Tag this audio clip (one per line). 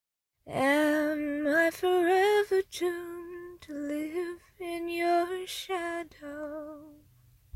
vocal
girl
lyrics
vocals
female
voice
sing
singing